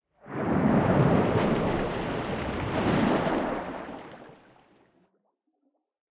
deep slow splash
A slowed down step in a puddle.